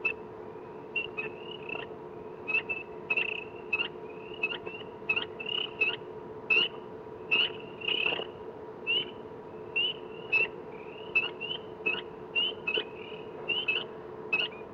Sound of little frogs known as "peepers", which come out in the Spring in marshy areas in the Northeastern US
New-England, peepers, frogs, Spring